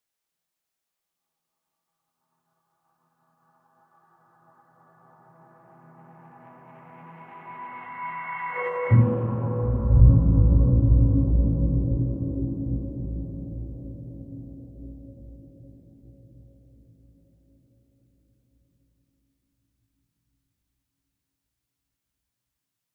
λόγος Timpani
Logos Timpani made from synths and lots of reverb layers.
Sound, Sweep, logos